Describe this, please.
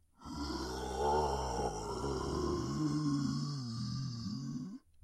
Zombie Groan
Finally uploaded a new sound on here after over a million years. Just decided to mess around with Audacity and I made this abomination. You can go ahead and use it in any projects; I really don't care.